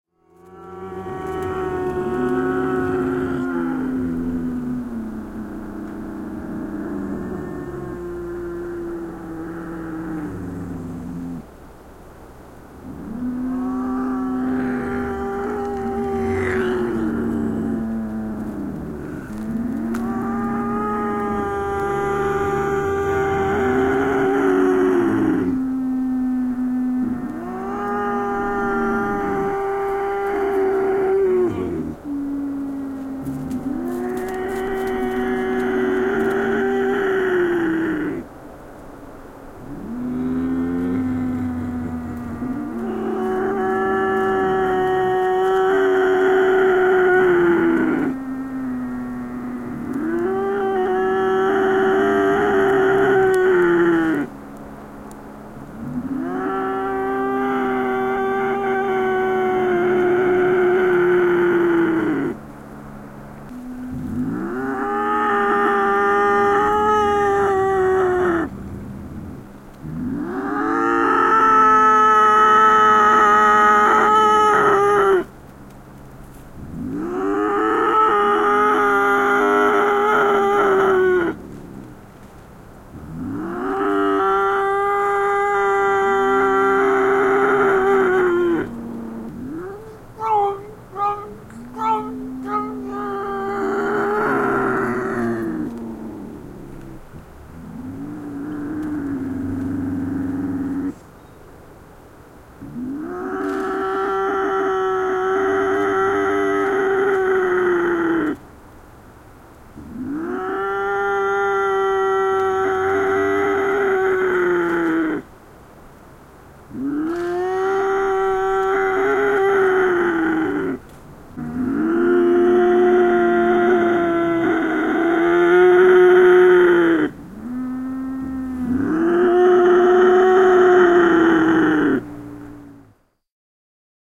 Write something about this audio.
Ilves murisee ja mouruaa / One or two lynxes growling
Pari ilvestä murisee ja mouruaa. Taustalla kaukaista liikennettä.
Paikka/Place: Suomi / Finland / Ähtäri
Aika/Date: 18.03.1993
Field-Recording, Suomi, Wildlife, Finnish-Broadcasting-Company, Finland, Yle, Wild-Animals, Yleisradio, Animals, Lynx, Soundfx, Tehosteet